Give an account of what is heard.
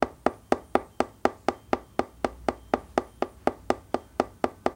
Pisoteo Ansiedad
person trampling axiously
anxiety, rush, trampling